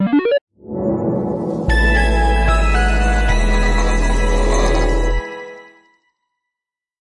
The startup sound and jingle for a futuristic computer.
Created using sampling and granular synthesis. (Synth sounds created from sampling an elevator ding, a piano note, and the sound of blowing on a glass bottle.)
composite, computer, electronic, experimental, futuristic, jingle, melodic, soundscape, startup, synth